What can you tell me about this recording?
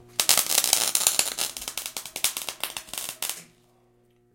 welder sparks good detail1 short weld
sparks, welder, detail, good